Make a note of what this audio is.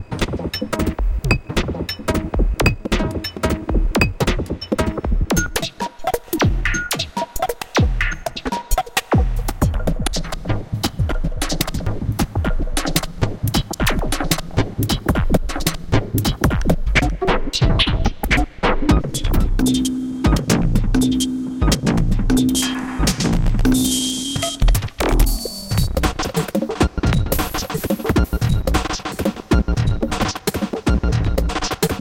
This loop has been created using the program Live included Ableton 5and krypt electronic sequencer drums plug in in the packet of reaktorelectronic instrument 2 xt